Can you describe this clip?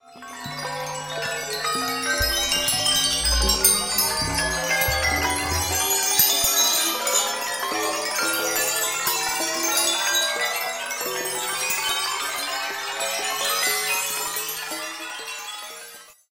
metal chime streams1
A variety of treatments on a metallic wind chime.